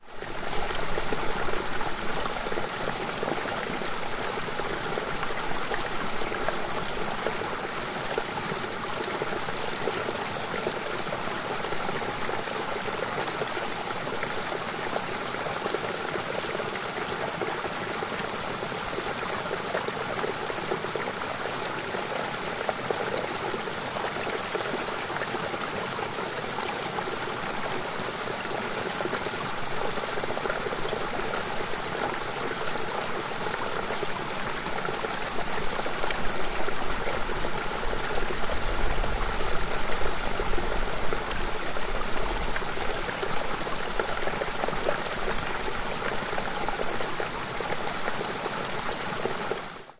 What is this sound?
DR-100 0020 Water stream in Greenland
recorded in Nuuk, Greenland, outside the city. a big pile of snow melting on the mountain's side made this little stream of water.